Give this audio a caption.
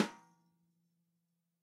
Drums Hit With Whisk